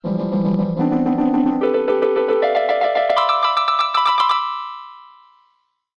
16-Corer circulos
cartoon, funny, fuuny